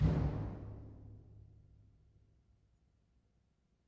One of a series of sounds recorded in the observatory on the isle of Erraid
resonant, field-recording, hit